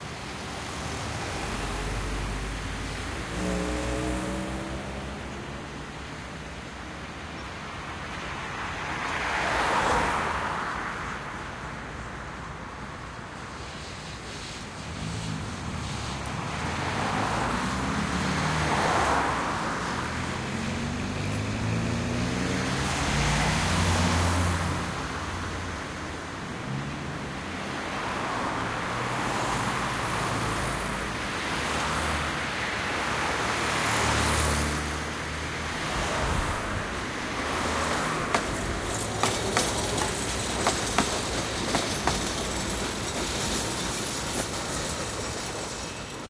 City Ambience
ambience, cars, city, field-recording, traffic, urban